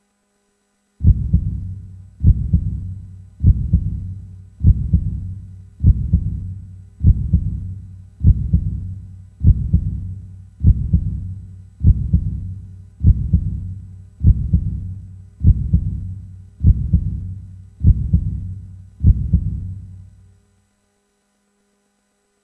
Dramatic hearbeat sample
dramatic, heartbeat